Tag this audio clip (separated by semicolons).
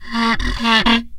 daxophone; friction; idiophone; instrument; wood